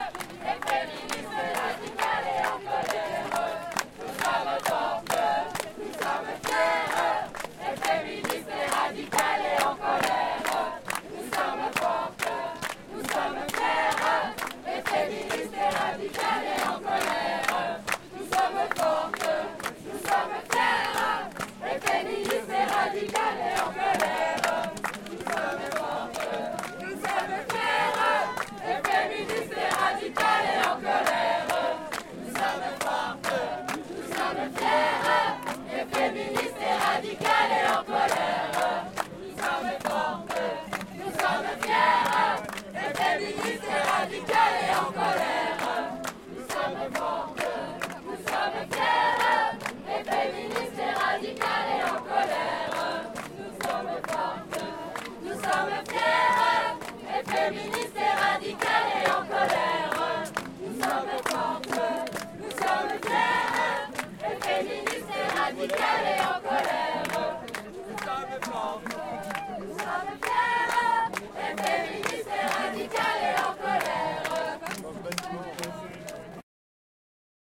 chant de manifestation féministe
Feminist demonstration song recorded with a Tascam DR-44WL at the demonstration of april 19 2018 in Lyon.